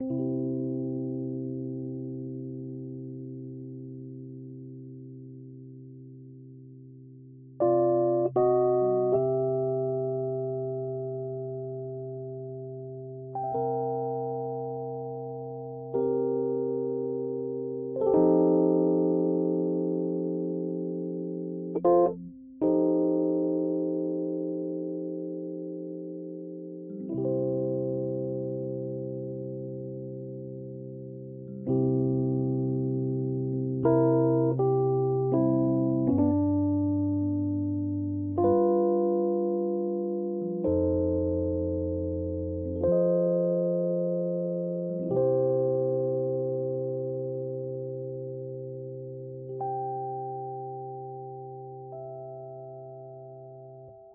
Song6 RHODES Do 3:4 80bpms
beat, bpm, blues, rythm, 80, Rhodes, Do, Chord, loop, HearHear